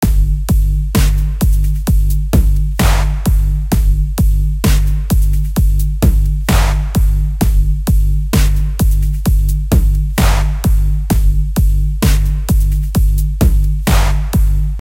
thick and tight kick - 11 19 18, 10.30 PM
Thick and tight kicks solid.